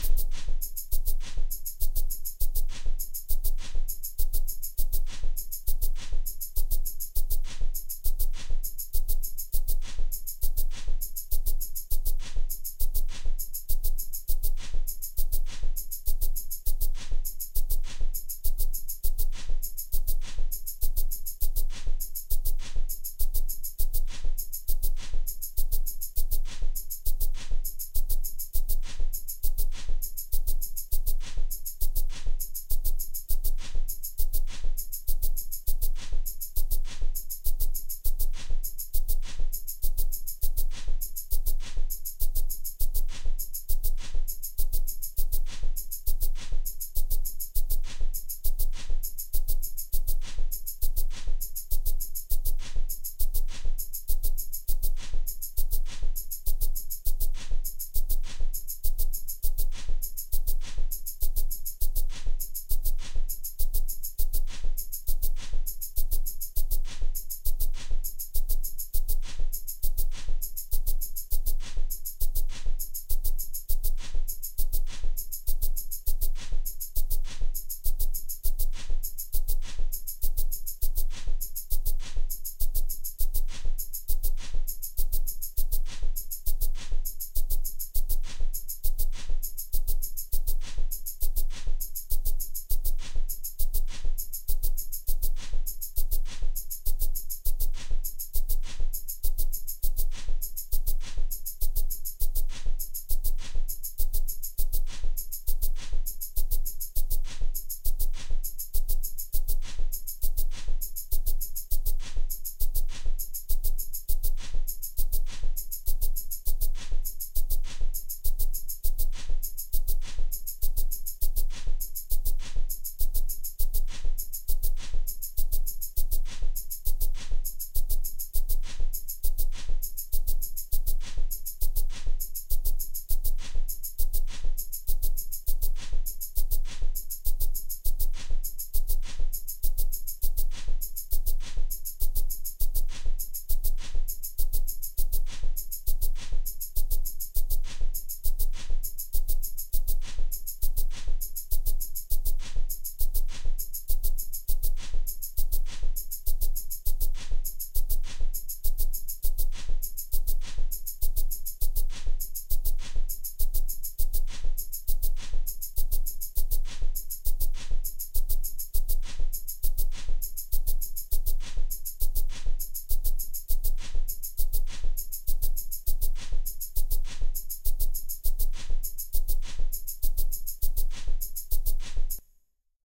Dru Drumming 3 (original)
Very Clean sounding pattern I created here!
B, Jazz, Pop, R